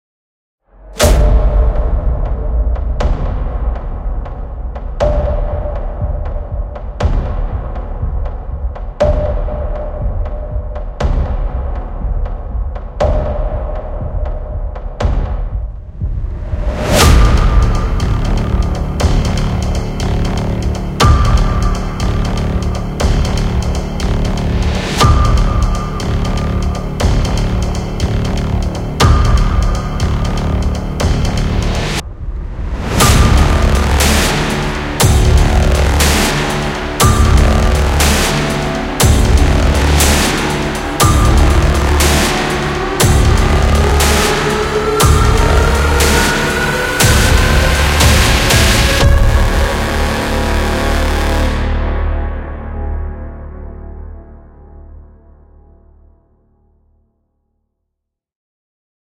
Short hard-hitting trailerish track.
Can probably be used for a product video, movie or similar.